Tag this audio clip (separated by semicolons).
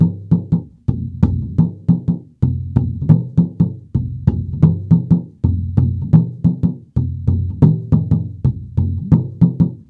bits; lumps; music; toolbox